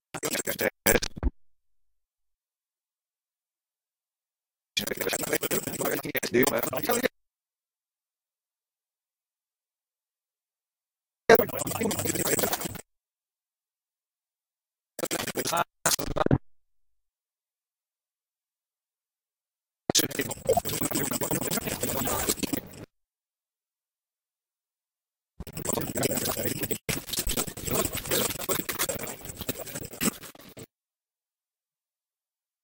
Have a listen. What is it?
Actual Pro Tools recording of cueing a Digibeta machine